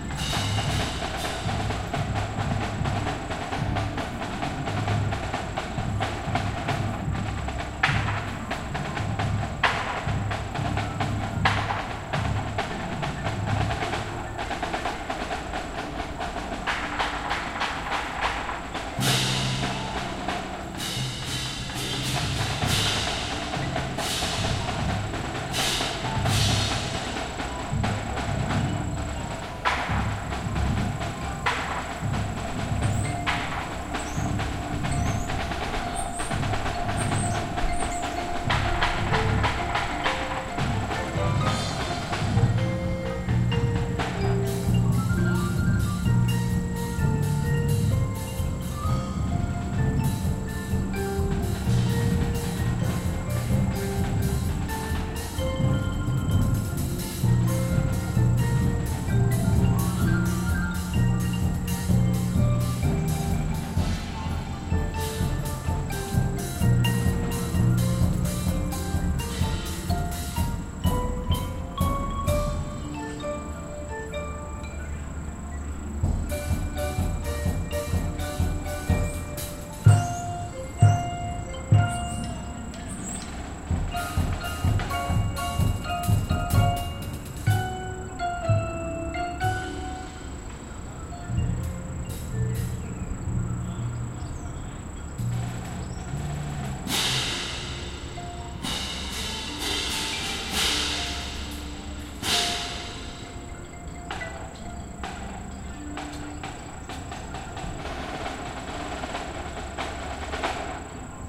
Snares, Cymbals, and Xylophones Ambience
Snares, cymbals, and xylophones practicing.